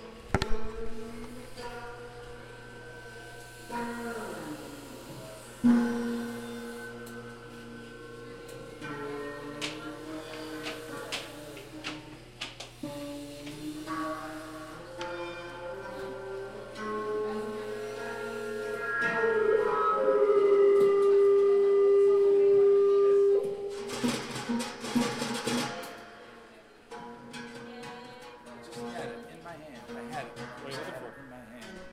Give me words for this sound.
Intonomuri Documentation 04
Recording of students building intonomuri instruments for an upcoming Kronos Quartet performance.
intonomuri, woodwork